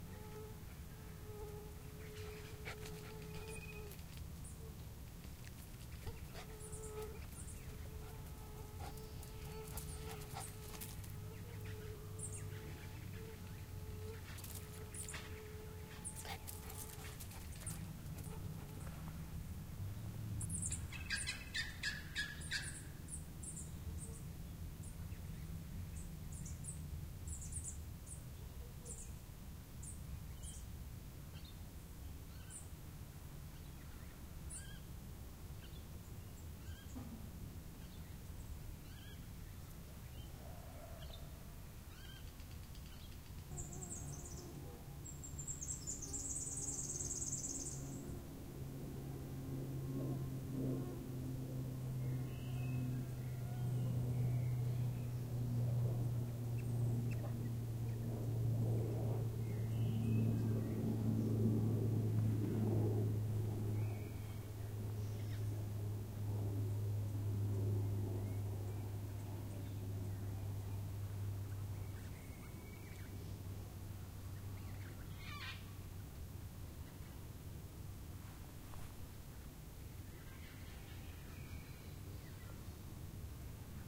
Bush Atmos 03
Bush / forest atmosphere with wide stereo image and various sounds. Includes a mosquito that wouldn't leave my mic alone. Also a dog panting in the background.
insect, walking, valley, buzzing, flies, dirt, dog, bush, chirping, grass, birds, rocks, Australia, trees, wind, forest, panting, insects, mosquito, fly, blowing, Australian, footsteps